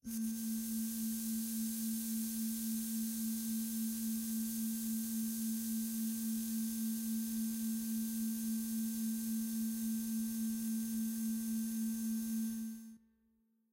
sci-fi drone
digital, sci-fi, fx, harsh